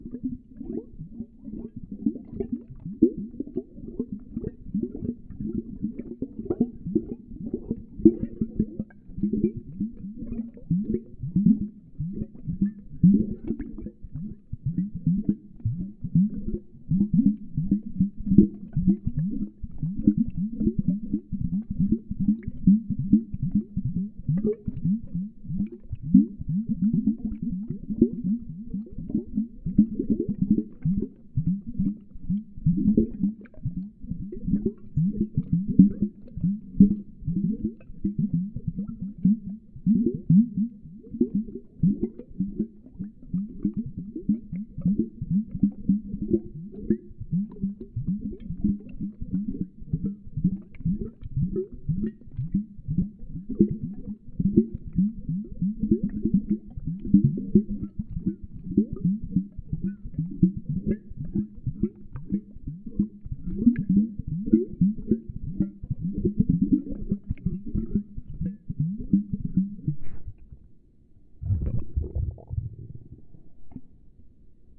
viscious liquid gurgling
Created for the sound of Chocolate gurgling underscoring a scene in Willy Wonka Junior. I believe I modified this sound:
gurgling, fluid, bubbling, liquid, viscous, thick, gurgle